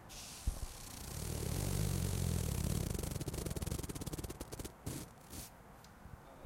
Slide on blinds
hiss, object, slide, fabric, cloth